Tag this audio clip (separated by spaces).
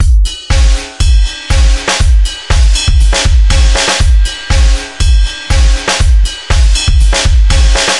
drum beat